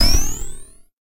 STAB 012 mastered 16 bit
An electronic percussive stab. Another industrial sound which makes me
thing about a spring, this time with a pitch bend on it. Created with
Metaphysical Function from Native Instruments. Further edited using Cubase SX and mastered using Wavelab.